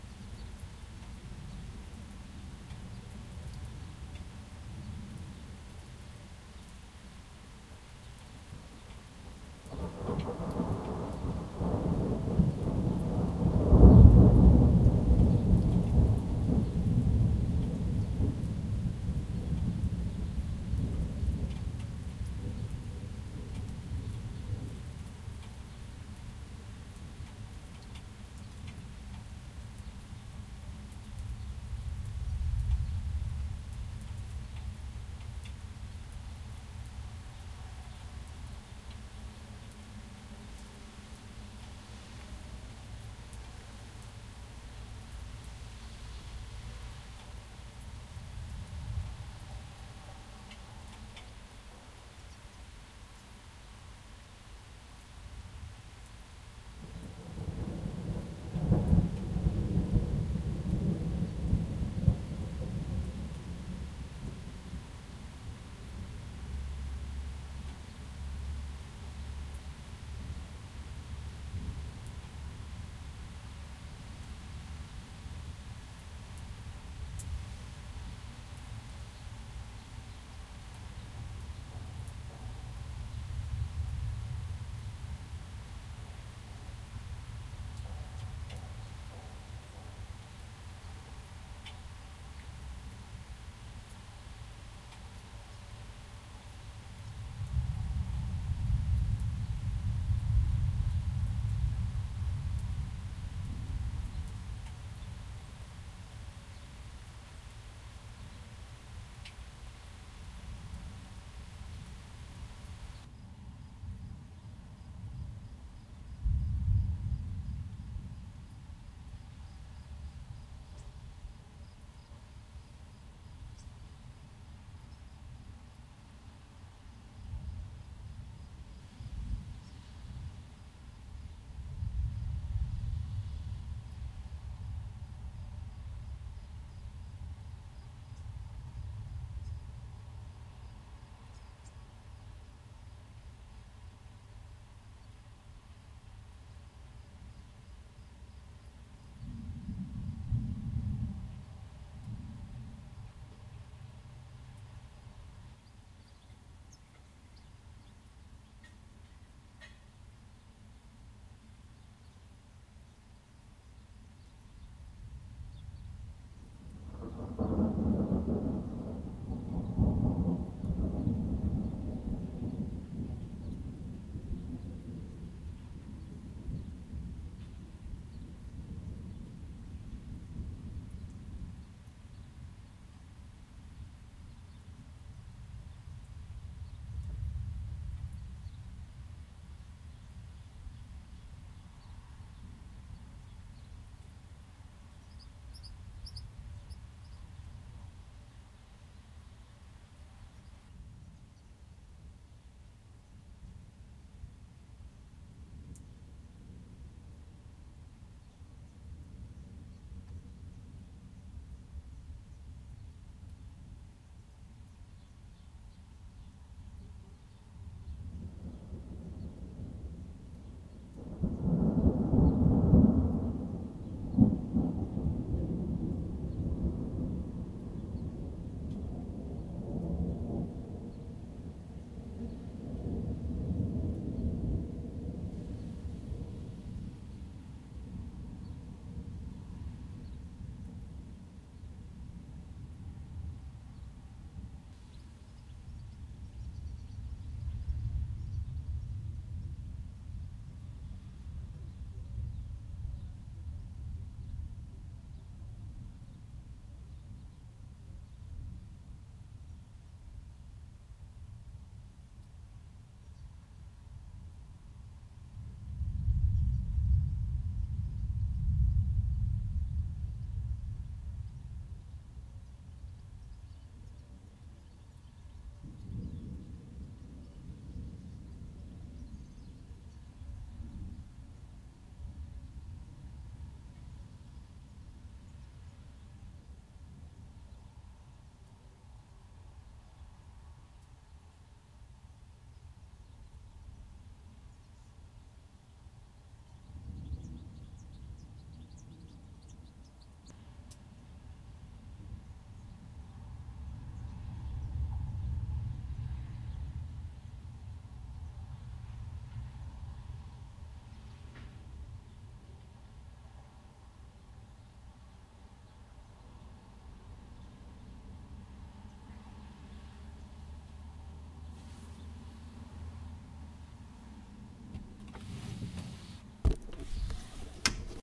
Summer Storm in Valencia
Sound hunter from Valencia, Spain